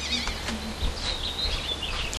newjersey OC seagull KILLERloop
Excellent loop of seagull sound recorded with DS-40 and edited in Wavosaur.
ocean-city, loop, new-jersey, vacation, bird, seagull, field-recording